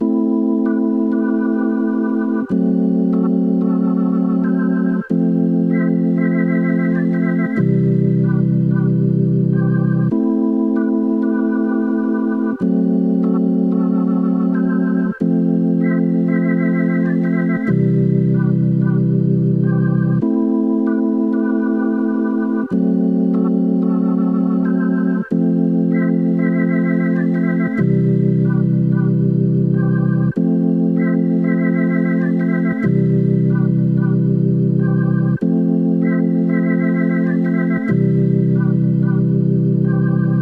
Lofi Jazz Organ Loop 95 BPM
95, beat, beats, bpm, chill, hiphop, jazz, lo-fi, lofi, loop, loops, melody, music, organ, out, pack, relax, sample, samples